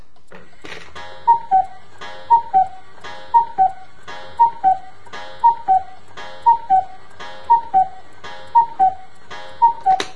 Cuckoo clock sounds 9 times, little door slams shut.